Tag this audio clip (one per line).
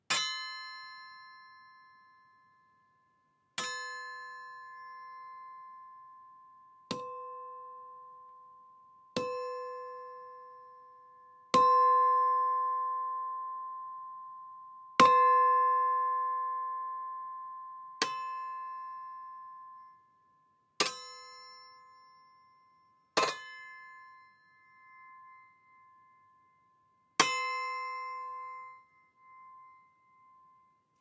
Armour
Blacksmith
Blade
Chopping
Cut
Cutlery
Ding
Draw
Drop
Fantasy
Fight
Floor
Hit
Kitchen
Knife
Knight
Knives
Medieval
Metal
Rip
Scrape
Sharpen
Sharpening
Slash
Stab
Sword
Swords
Swordsman
Twang
Weapon